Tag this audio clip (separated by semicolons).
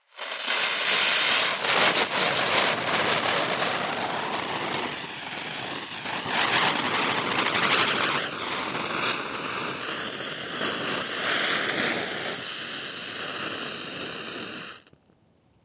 bee; field-recording; fire; firecracker; sparks; spinning; stereo